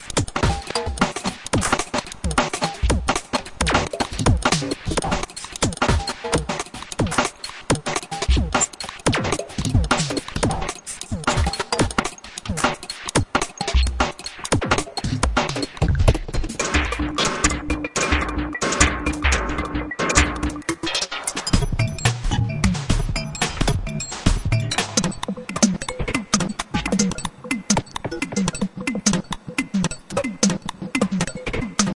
This loop has been created using the program Live included Ableton 5and krypt electronic sequencer drums plug in in the packet of reaktorelectronic instrument 2 xt